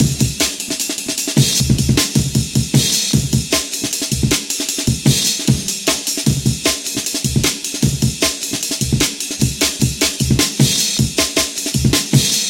rushed mirror.R
amen loop with a lot of crashes just made it quick for a bassline as an example for someone....